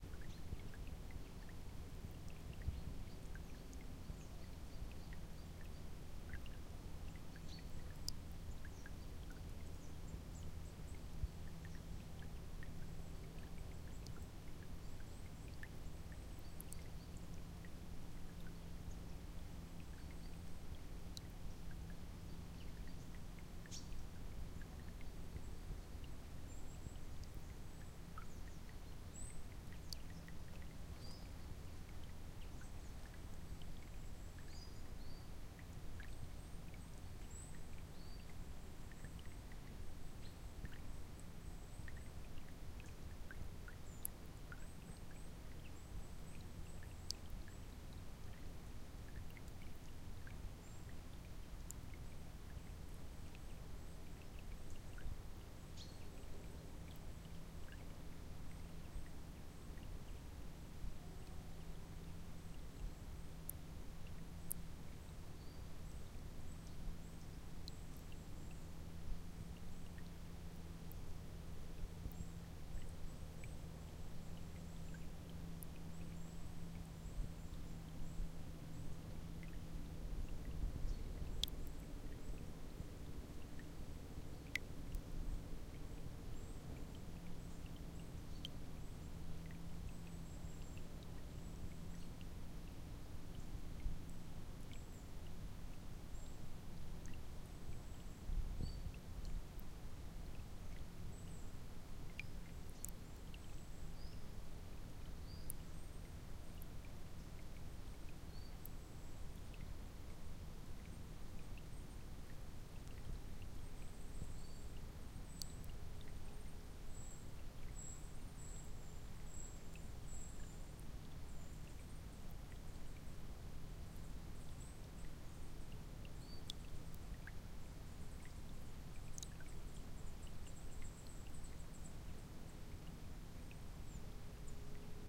very small rivulet birds
Trickling drops in a rivulet (very small brook)on a meadow, with some birds in the distance. Recorded on Zoom H2 in the south of sweden.